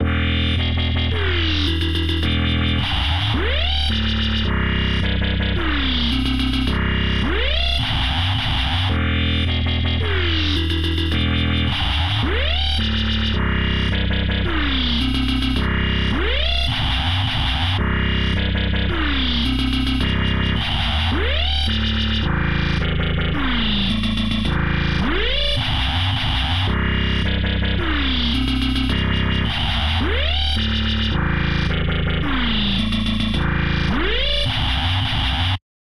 Dark synth loop
dark, looped, synth, ableton